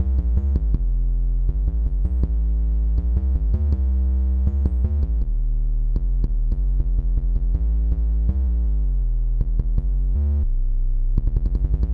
all square 8 bar 2.R
8 bars of square bass, low, unflanged, good for a hip hop or d&b bassline.....